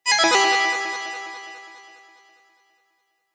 Retro Game Sounds SFX 24
pickup, shoot, gamesound, retro, audio, game, sfx